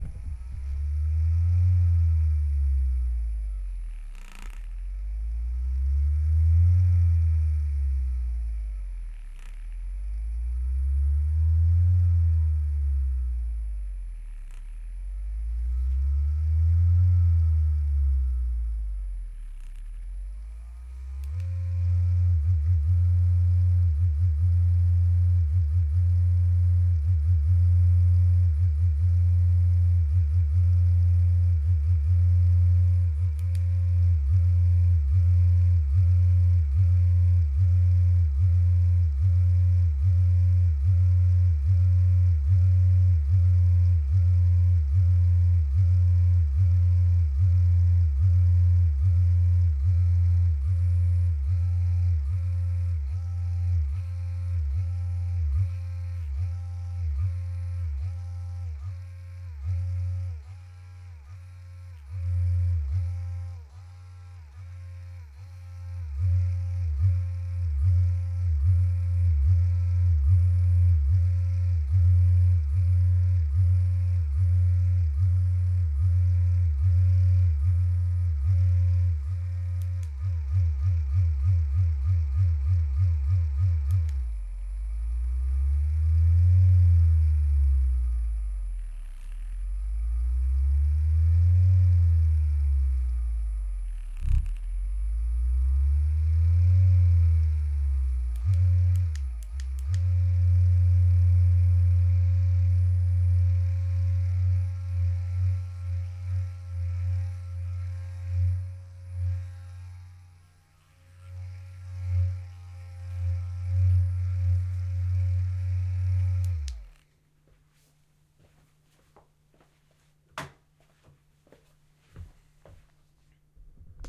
FX Vibration Tool LR
A very powerful vibration tool is recorded here.
Effects, Free, Recording, Vibrator